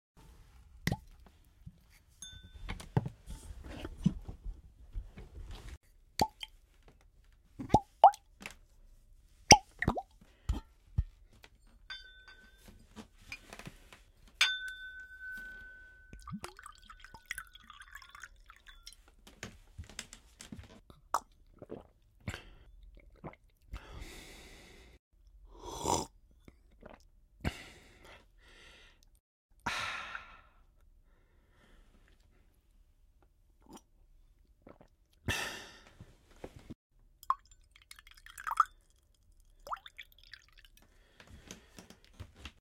Uncorking a bottle, pouring in a glass, manipulating a glass, man drinking while making noise, pouring
bottle, corkswrew, dink, fill, glass, mouth-noises, pour, pouring, water